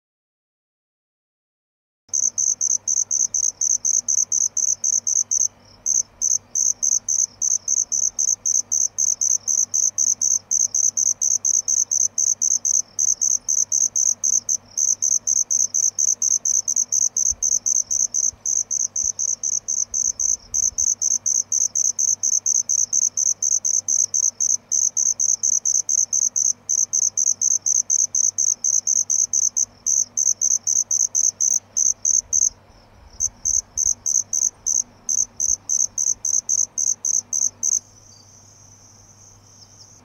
Grillons-Amb nuit3
Some crickets during the night in Tanzania recorded on DAT (Tascam DAP-1) with a Sennheiser ME66 by G de Courtivron.